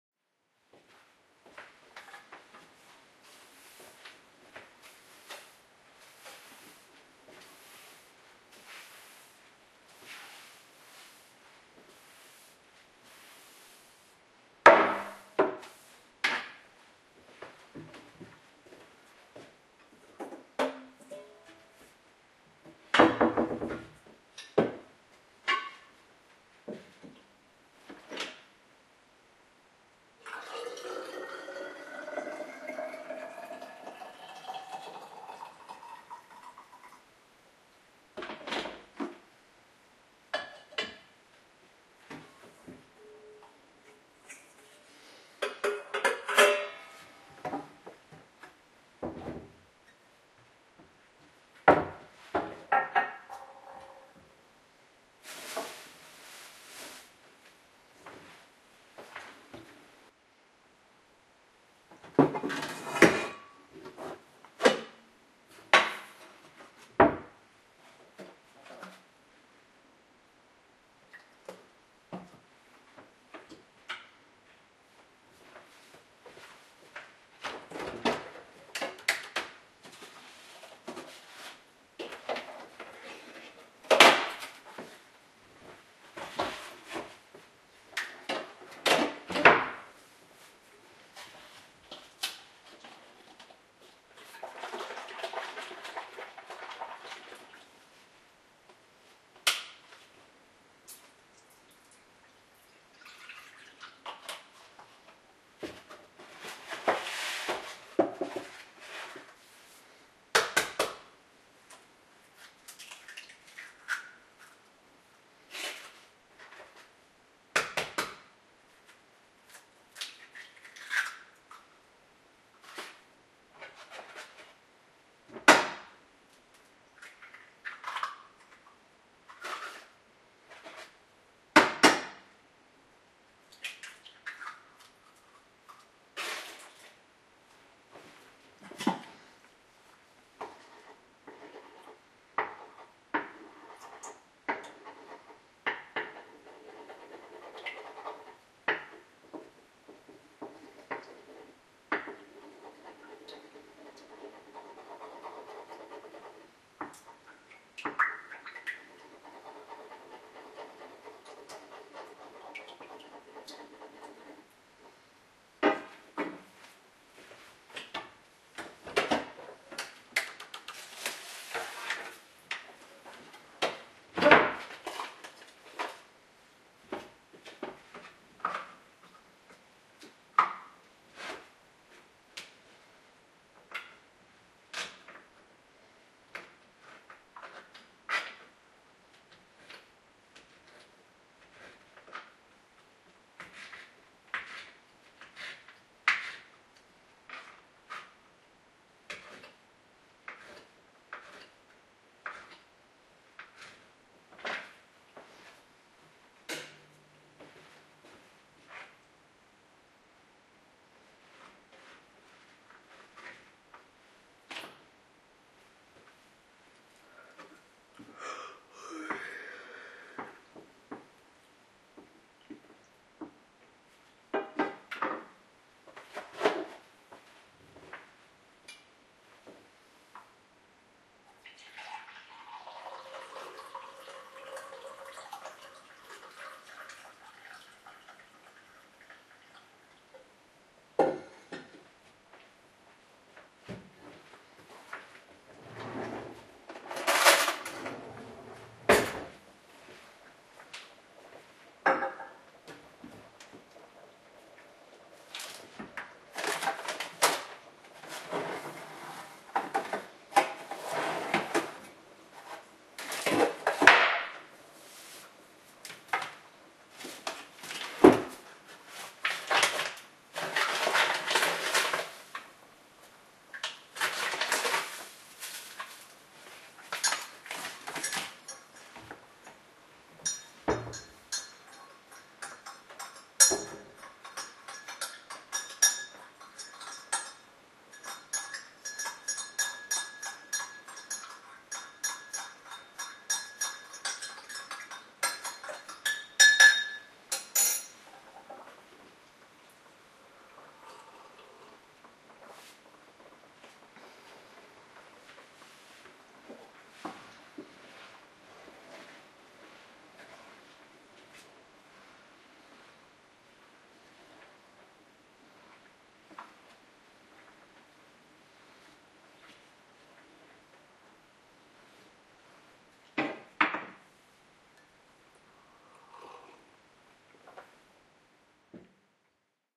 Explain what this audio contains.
Kitchen Ambiance - Making Breakfast
Morning kitchen routine - sweeping floor, making tea, a sleepy yawn, cracking eggs etc etc. Enjoy! Recorded with CanonLegria camcorder.
ambiance, breakfast, CanonLegria, floor, kitchen, pouring, sounds, sweeping, water